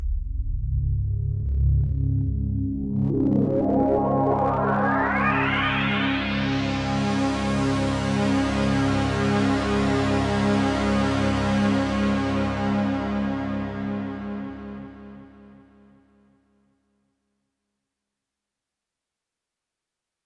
Pulse/Swell made with Juno-60 Syntehsizer
Effect, Juno-60, Pulse, Sci-fi, Synth